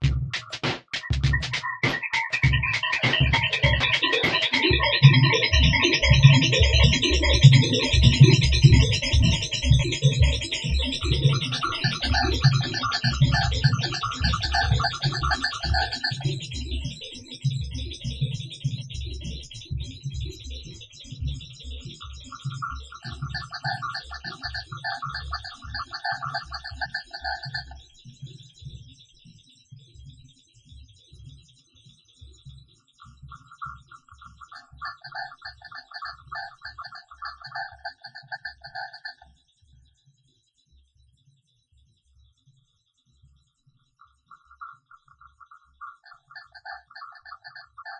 DISTOPIA LOOPZ 042 100 BPM
DISTOPIA LOOPZ PACK 02 is a loop pack. the tempo can be found in the name of the sample (60, 80 or 100) . Each sample was created using the microtonic VST drum synth with added effects: an amp simulator (included with Cubase 5) and Spectral Delay (from Native Instruments). Each loop has a long spectral delay tail and has some distortion. The length is exactly 20 measures at 4/4, so the loops can be split in a simple way, e.g. by dividing them in 20, 10 or 5 equal parts.
bpm, delay, distortion, loop, rhytmic